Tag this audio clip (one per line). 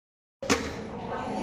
beverage
can
drink
soda
tin-can
trash